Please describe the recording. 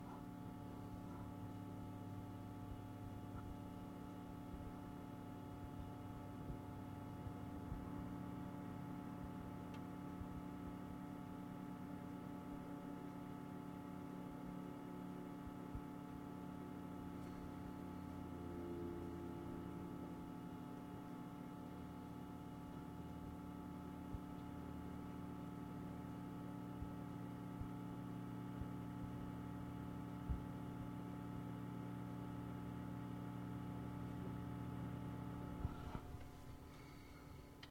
Computer Fan
This was recorded with an Audio Technica AT8035 into a Tascam DR-680 field recorder. The sound isn't normalized or otherwise altered except for removing the slate. Its a pretty raw file so some editing made be needed to get something more useful from it.
The computer where this sound came from is quite old and was bottom of the line even when brand new.